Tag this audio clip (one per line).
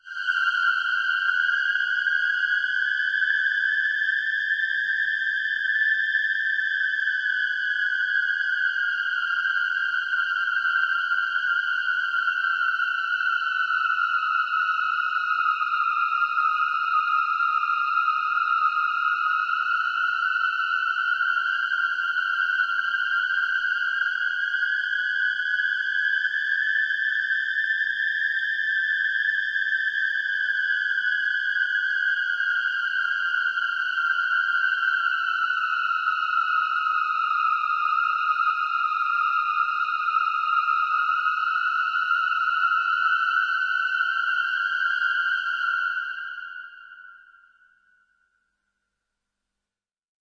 16-bit
Hz
synthesized